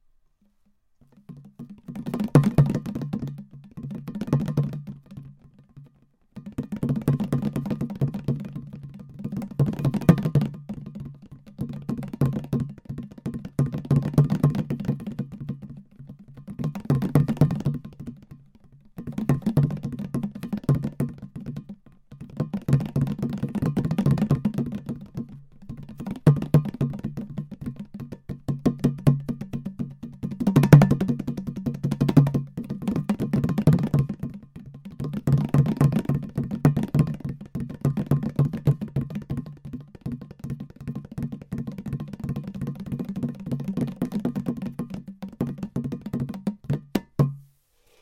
small clay drum, rolling sound with fingers
clay, drum, fingers, rolling, small, sound